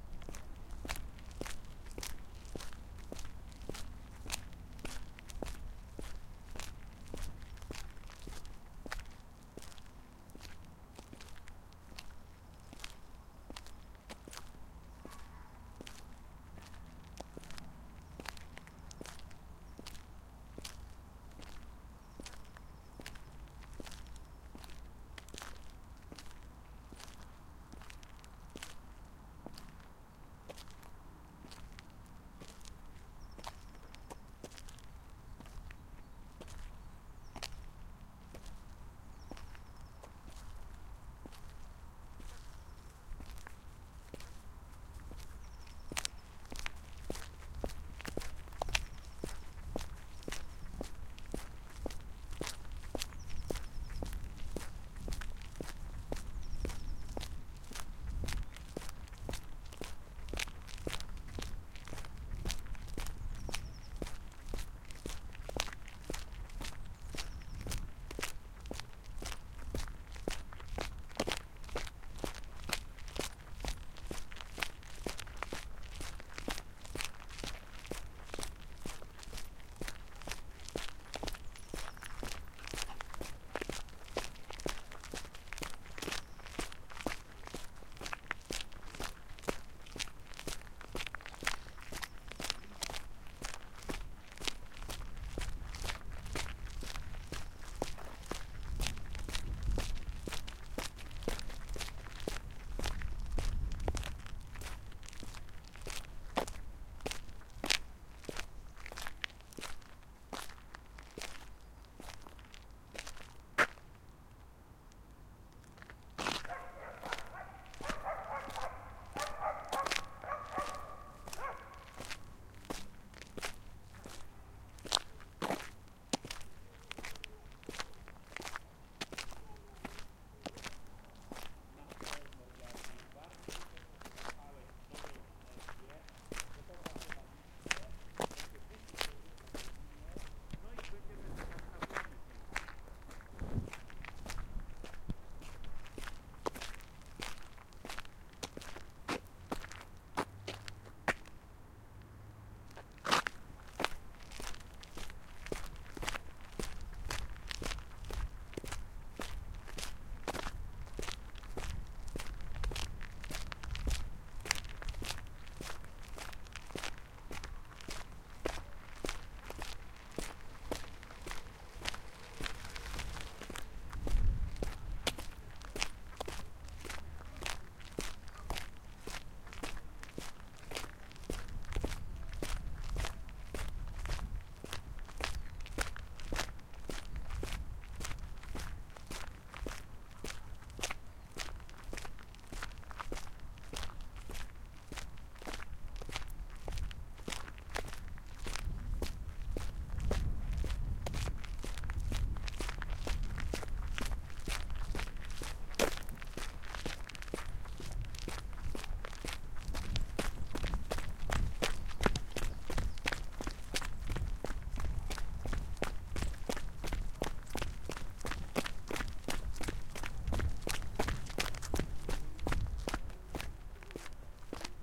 kroki-zwir-bieg
[pl] Wczesna wiosna. Kroki w męskim obuwiu w pobliżu lasu na drodze żwirowej, Szybki, wolne, bardzo szybkie, szczekający w oddali pies, przejeżdżający rower, bieg.
V4V
[eng] Early spring. Steps in men's shoes near a forest on a gravel road, Fast, slow, very fast, a dog barking in the distance, a bicycle passing by, running
V4V rulez